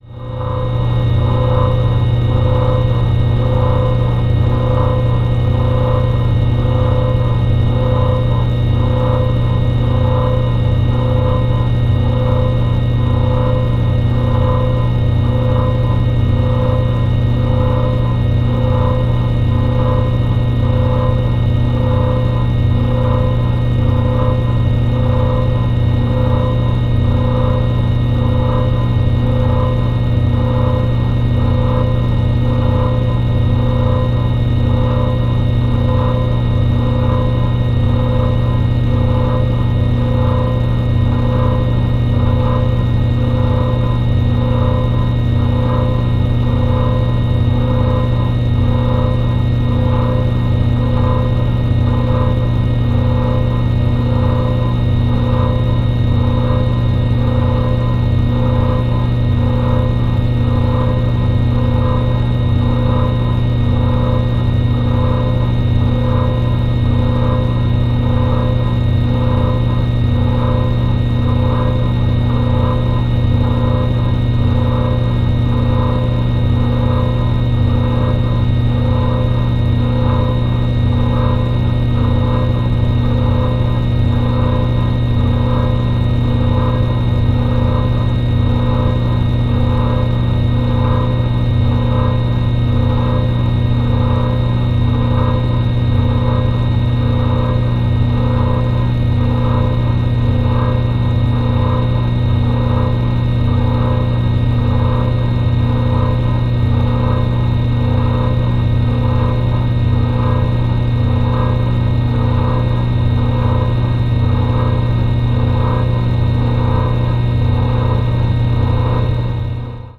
Contact microphone recording of an Agilent Tri-Scroll vacuum pump made with the microphone on the front cover plate
Contact, Industrial, Machinery, Mechanical, Mono, Pump, Vacuum